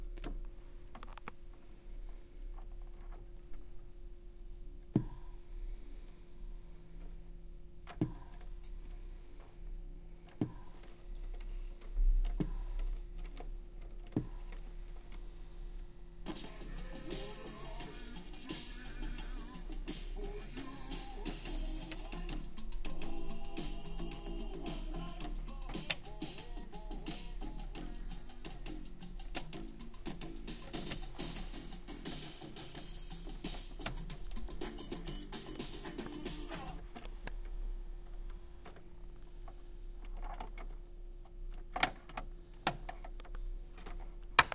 Favourite sound in livingroom of A.
house-recording, home, room, recordplayer